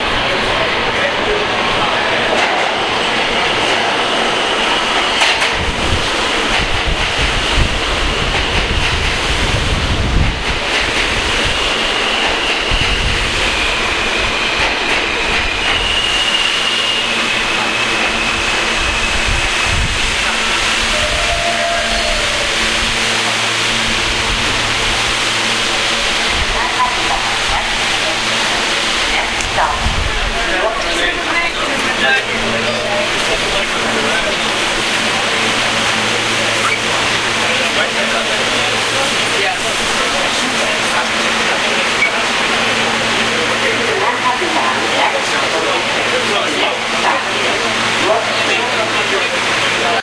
An F train arrives at an underground platform, doors open passenger walks on doors close with recorder inside the train car.
announcement, close, ding, doors, open, subway, ftrain